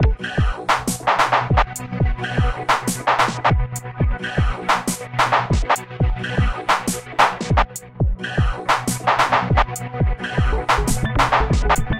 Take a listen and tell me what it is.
This loop has been created using the program Live Ableton 5 and someof the instruments used for the realization Usb Sonic Boom Box severalsyntesizer several and drums Vapor Synthesizer Octopus Synthesizer WiredSampler Krypt electronic drum sequencer reaktor xt2 Several syntesizers diGarageband 3